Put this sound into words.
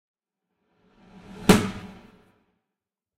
football keeper ball
A football keeper doing his job by keeping a ball out of the goal, hitting his leather handshoes. Sound has a lot of reverb
keep, ball, keeper, sports, sound, effect, foley, goal, football